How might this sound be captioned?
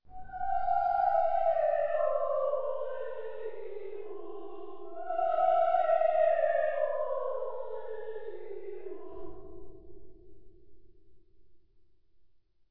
Singing Ghost 2
confusing, creepy, hard-to-name, perplexing, strange, weird